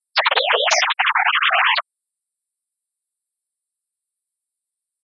Messing around with "Coagula". Try view the spectrogram!